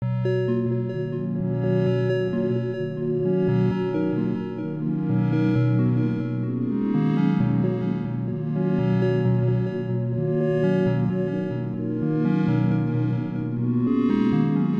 Floating Synth Melody at 130 BPM C major loop music
130 bpm, C major
130, 130-bpm, 130bpm, abakos, C, c-major, Cmajor, FL-studio, loop, melody, music, musical, synth, synthesizer, techno, trance